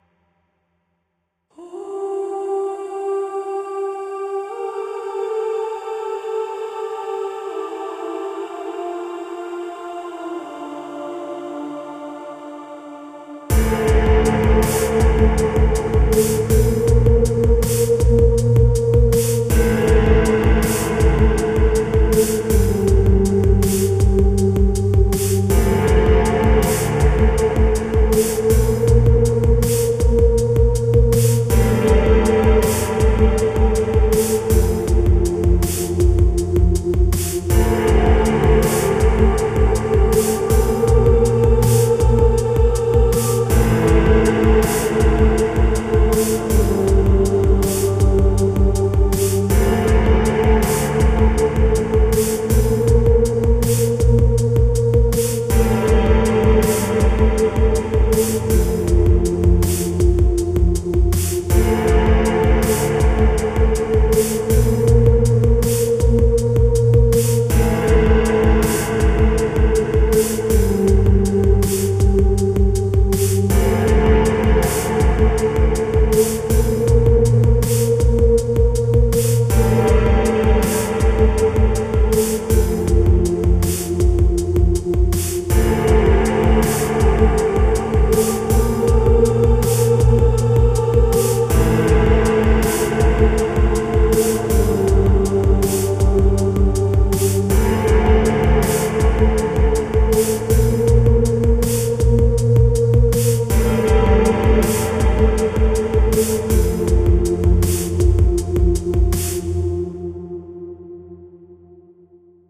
Similar to ambient beat 1 but less happy